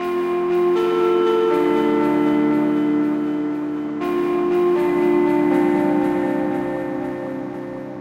Pitched Bell 02
2, bell, iris, low, pitched, sampled, slow